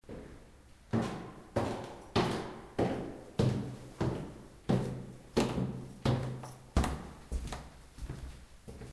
I10 wooden stair
man going up on a wooden stair into a warehouse
steps, old, stair, wooden